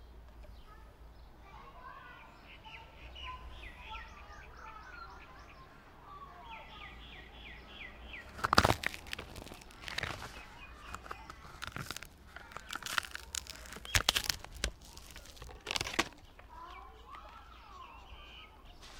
Tree bark crackle and snap gore
Tree bark being broken and crushed. Good for gore effects.